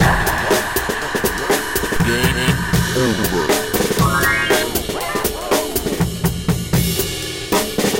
beat combo 9 game over
I do this to finish the shows. I say "game over" sampled into a drum/scratch fill. Logic
beat, game-over, 120-bpm